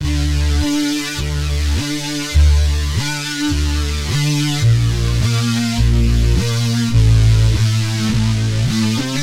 Simple Bass 3
Simple distortion bass.
bass, distortion, riff, sharp